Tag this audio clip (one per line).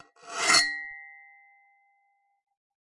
knife
metal
metallic
ringing
scrape
scraping
shing